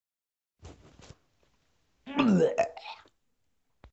Barf Sound
Barf, Gag, Throw-up